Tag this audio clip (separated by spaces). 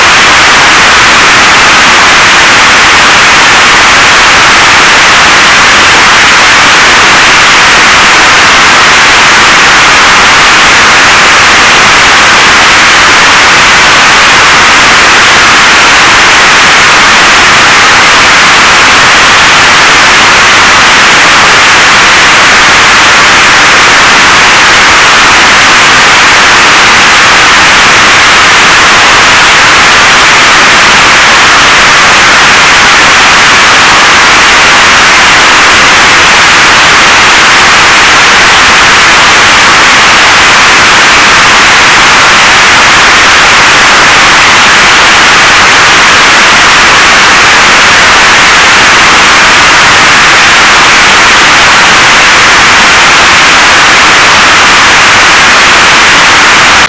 earbleed ear ouch